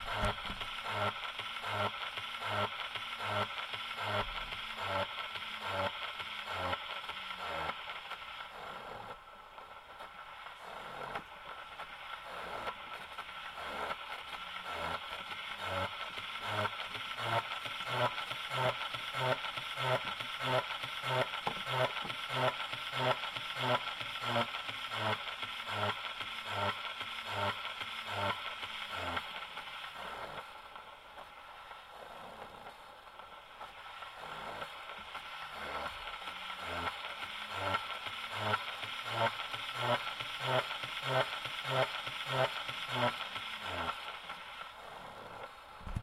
End scratch speed variations
Gramophone End scratch playback manipulation.
antique, antique-audio, End-scratch, mechanical-instrument, Playback-rate, shellac